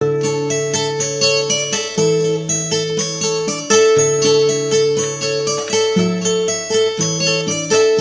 FORGE Guitar
A collection of samples/loops intended for personal and commercial music production. For use
All compositions where written and performed by
Chris S. Bacon on Home Sick Recordings. Take things, shake things, make things.
vocal-loops, sounds, piano, loop, guitar, free, Folk, looping, harmony, synth, drums, loops, indie, acapella, voice, Indie-folk, melody, samples, original-music, bass, drum-beat, rock, whistle, acoustic-guitar, beat, percussion